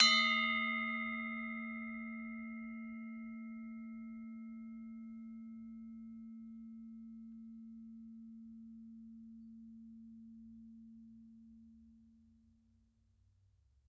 University of North Texas Gamelan Bwana Kumala Ugal recording 6. Recorded in 2006.